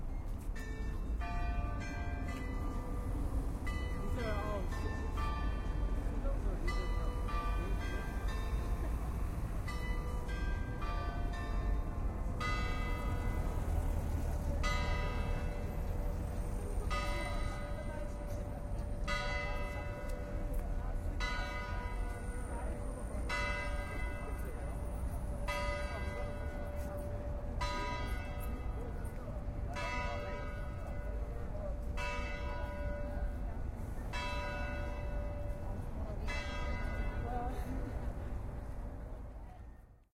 A church bell ringing at Av dos Aliados in Porto recorded with Edirol R-09HR on July, 18th 2009 at night, during the SMC2009 summer school. Fade in/out edited with Audacity.